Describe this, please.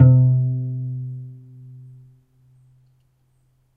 A pizzicato multisample note from my cello. The sample set ranges from C2 to C5, more or less the whole range of a normal cello, following the notes of a C scale. The filename will tell you which note is which. The cello was recorded with the Zoom H4 on-board mics.
acoustic, strings, multisample, cello, pluck, zoom, pizzicato